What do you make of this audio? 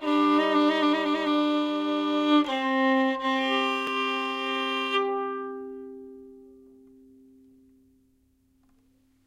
a couple notes played the usual way on violin. recorded with Rode NT4 mic->Fel preamplifier->IRiver IHP120 (line-in)/ un par de notas tocadas con el arco en un violin
bow, musical-instruments, violin